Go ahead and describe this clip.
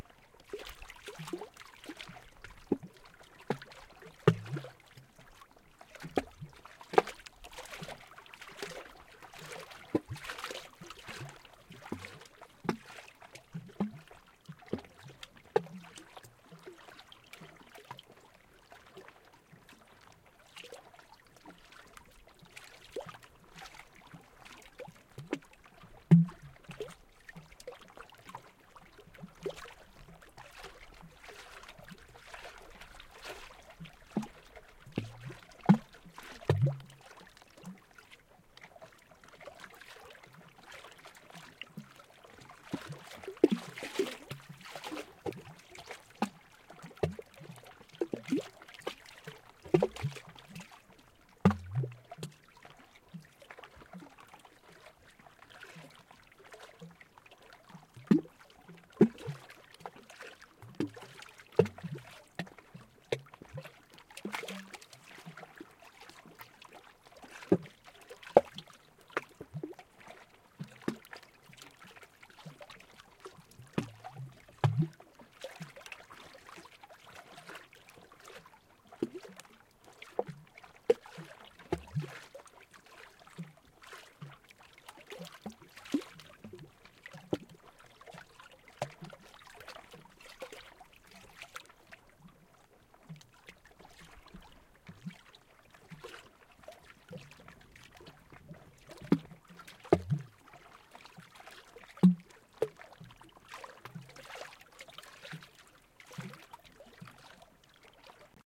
These three recordings are perhaps some of my favorites that I have recorded so far. It was one of those lucky moments where the waves on Coldwater Lake were hitting a tree just so to make the wonderful noises, soon after I stopped recording the waves changed and the sound stopped. There are three similar recordings of the waves hitting the tree, each recorded from a different position. Recorded with AT4021 mics into a modified Marantz PMD661.
Random sound of the day April 3, 2019
nature, ambient, field-recording, water, outside, wood